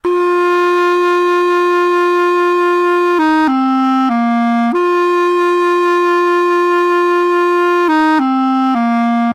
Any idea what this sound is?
From a recording I'm demoing at the moment.Clarinet sax part 2 (two sax parts, two clarinet parts) at 103 bpm. Part of a set.Recorded in Live with Snowball mic.